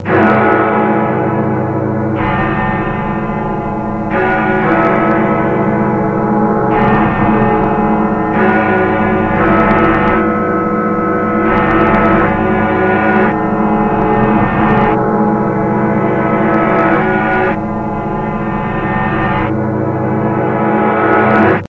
the famous bells of big-ben layered over and reversed.
once again made by me.
big-ben, chimes, clock, distorted, layer